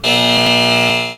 buzzer as in a game show. Couldn't find a good one here, so I made it myself. Recorded With Realtek High Definition Audio Headset. Edited with Audacity
out-of-the-game, mournful, longing, game-show, cried, game, loud, ear-splitting, ending, sad